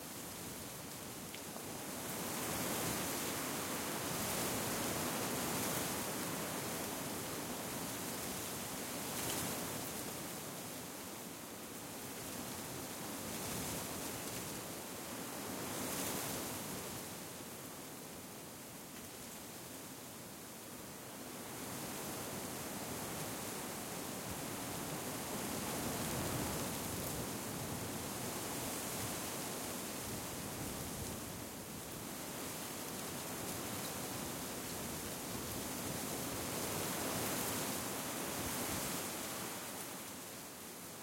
Ambiance Wind Forest Trees Loop 01
Ambiance (loop) of wind in forest/trees.
Gears: Tascam DR-05